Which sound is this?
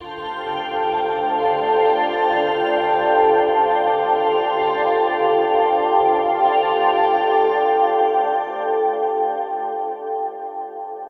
A luscious pad/atmosphere perfect for use in soundtrack/scoring, chillwave, liquid funk, dnb, house/progressive, breakbeats, trance, rnb, indie, synthpop, electro, ambient, IDM, downtempo etc.
130, progressive, expansive, evolving, soundscape, 130-bpm, liquid, house, morphing, reverb, dreamy, luscious, long, wide, effects, pad, atmosphere, melodic, ambience